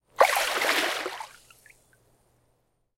Water Swirl, Small, 20
Raw audio of swirling water with my hands in a swimming pool. The recorder was placed about 15cm away from the swirls.
An example of how you might credit is by putting this in the description/credits:
The sound was recorded using a "H1 Zoom recorder" on 1st August 2017.
flow, pool, small, splash, swirl, swirling, swooshing, water